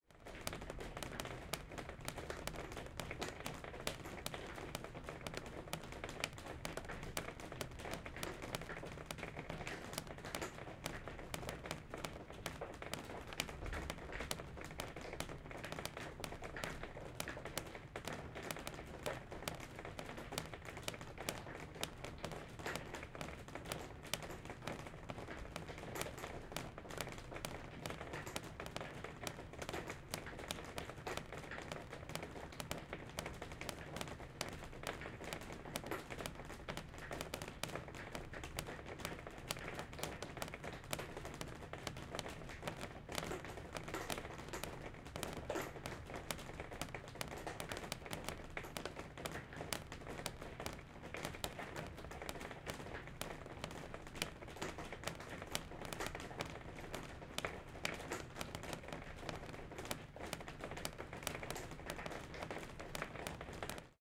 Raining on the Glass Window 20180905-2
Recording the rain inside my house.
Microphone: TLM103
Preamp: Focusrite Scarlett